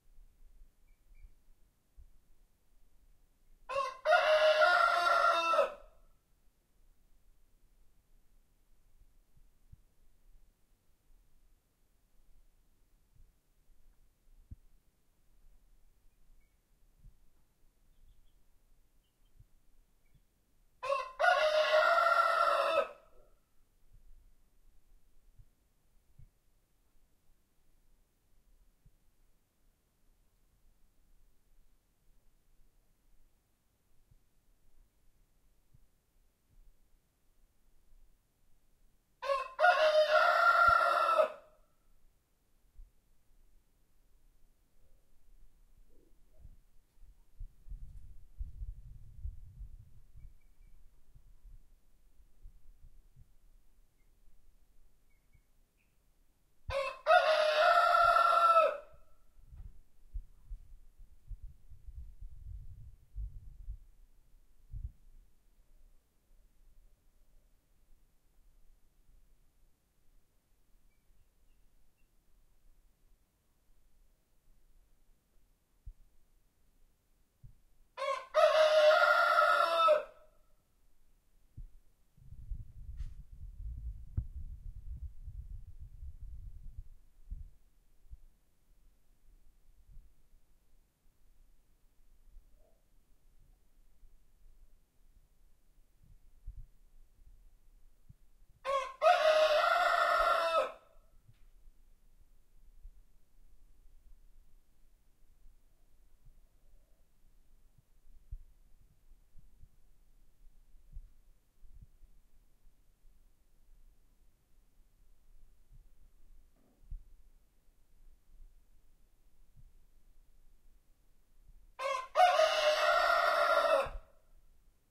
Rooster crowing - slightly far
| - Description - |
Rooster singing recorded a little far
chicken, crowing, farm, rooster, rural, wake